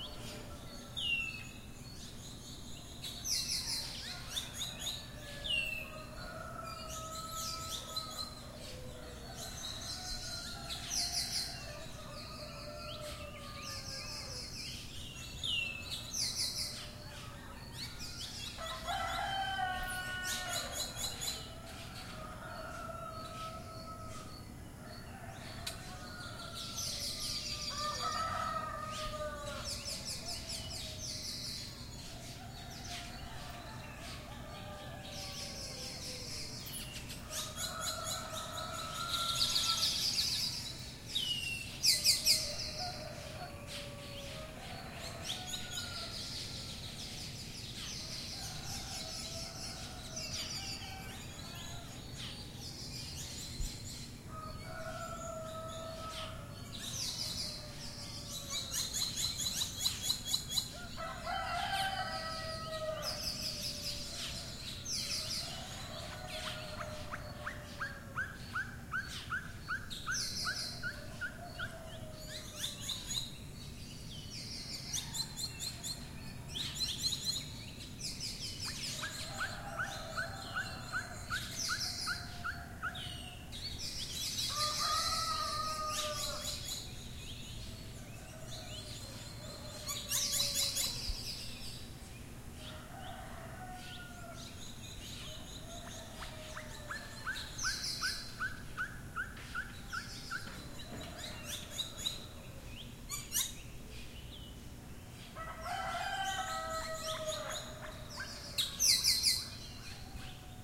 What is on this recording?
Dawn La Victoria-Cesar-Colombia
Dawn at La Victoria, Cesar, Colombia
dawn, rooster